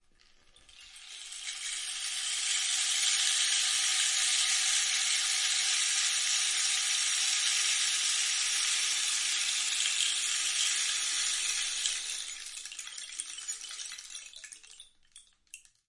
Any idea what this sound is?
Rainmaker 04 [RAW]
Simple recording of a long bamboo rain maker.
Captured in a regular living room using a Clippy Stereo EM172 microphone and a Zoom H5 recorder.
Minimal editing in ocenaudio.
Enjoy ;-)
acoustic, bamboo, instrument, percussion, rain, rain-maker, rainmaker, rain-stick, rainstick, rattle, raw, recording, Regenmacher